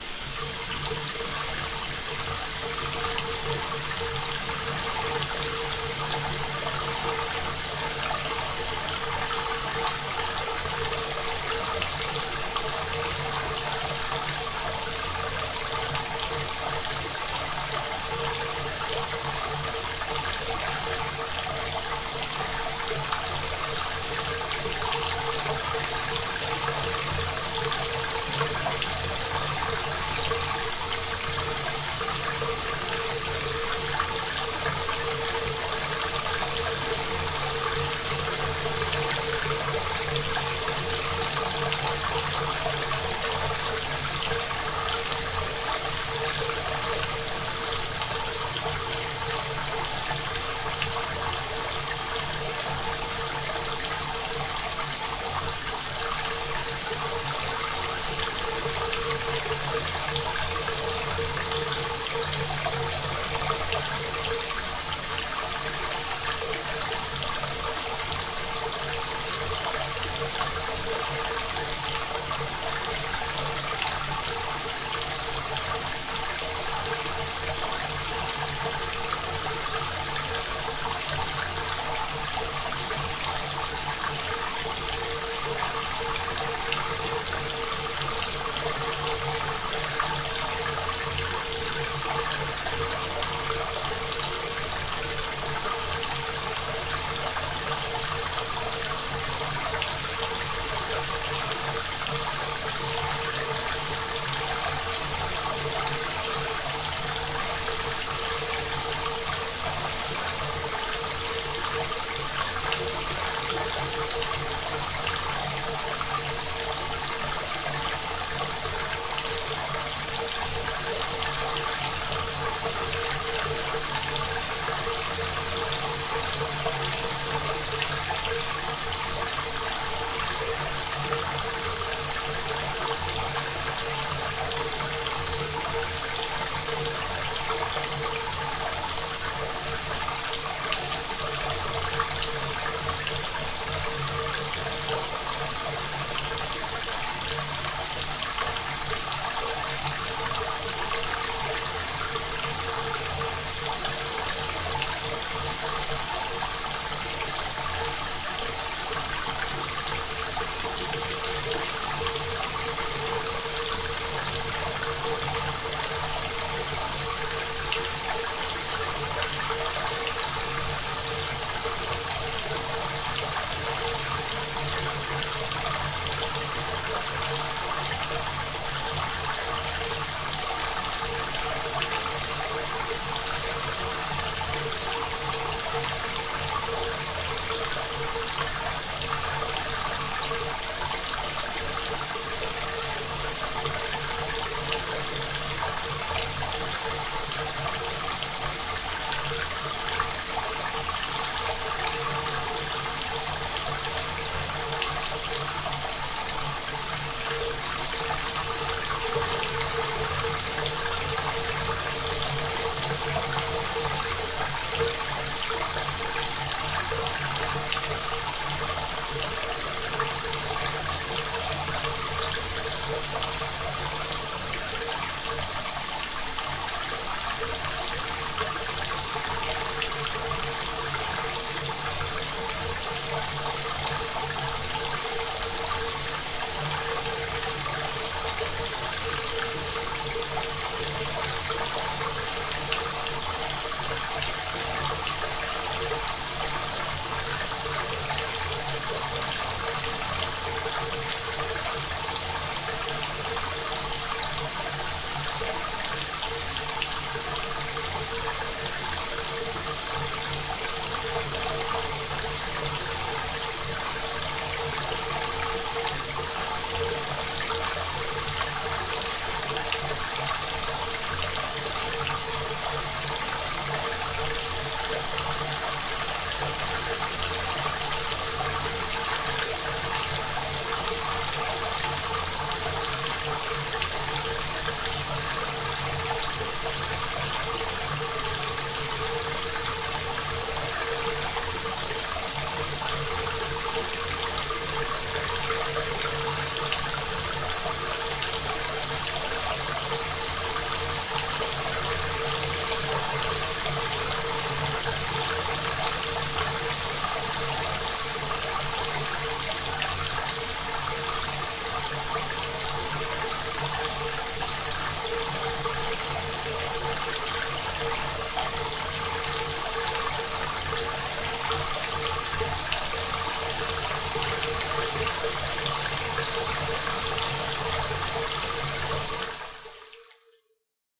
Five Minutes of Rain (reverb)
Five minutes of rain, artificially created.
Using a Blue Yeti USB condenser mic on the cardioid pattern, I made a 30-minute recording of sounds from my sink (drips, splashes, different faucet pressures) and cut them up into 60 files, later edited down to 20. These were layered together and mixed with white, pink, and Brownian noise.
Slight noise removal done. Recorded some basic mic noise, and used a subtractive processor (ReaFir in Reaper) to remove that sound from the final mix.
Convolution reverb was added for a fairly realistic set-it-and-forget-it option. I've uploaded a dry version as well.